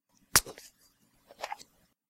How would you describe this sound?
Soda Open
Opening a soda/beer glass bottle with an opener
Open, Bottle, Capsule, Soda